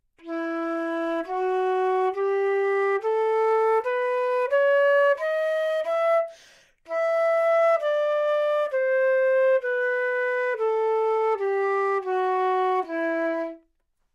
Flute - E natural minor - bad-tempo-staccato
Part of the Good-sounds dataset of monophonic instrumental sounds.
instrument::flute
note::E
good-sounds-id::7013
mode::natural minor
Intentionally played as an example of bad-tempo-staccato